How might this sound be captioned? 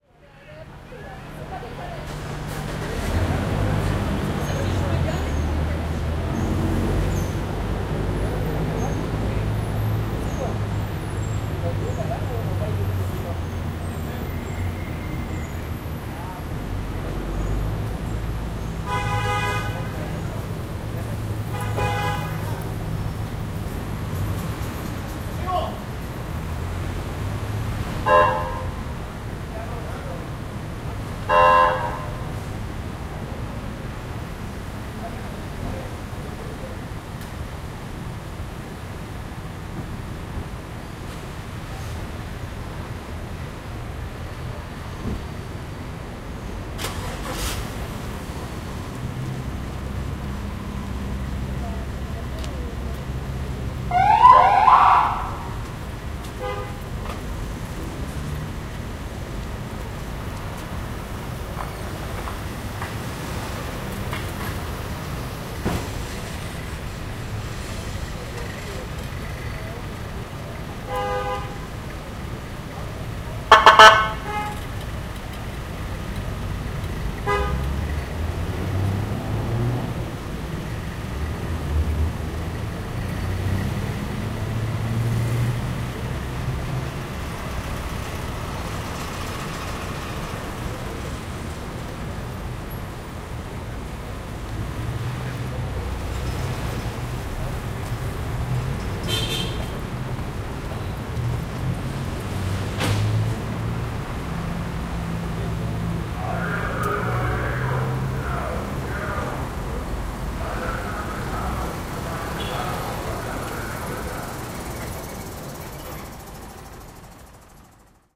AMB Trafic Ville
Dans une rue de Mexico
In a Street in Mexico City
Ambiance, City, Mexico, Trafic, Ville